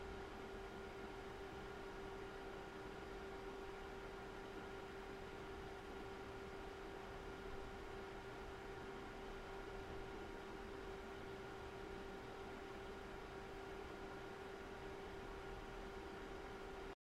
Room Ambience Fan Off
A room ambiance with a computer fan.
ambience, room